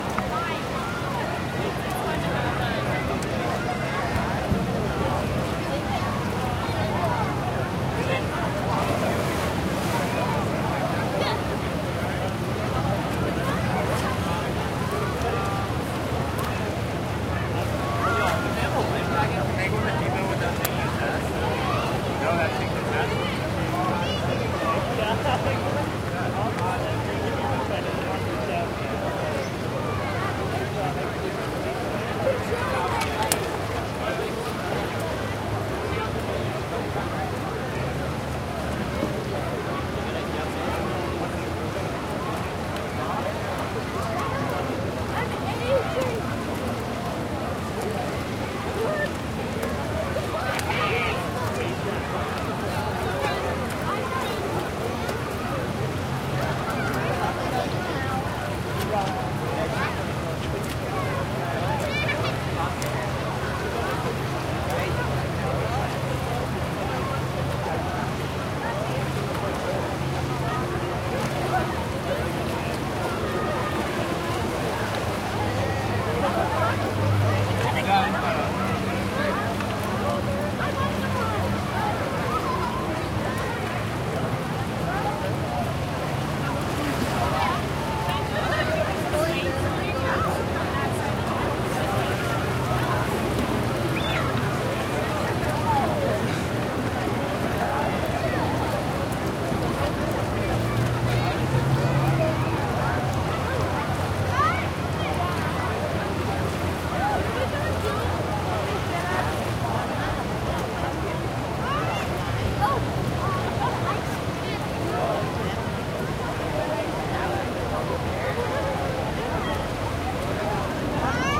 Ext Large Crowd at Sunnyside Pool
recorded on a Sony PCM D50
Crowd, Ext, Large, Pool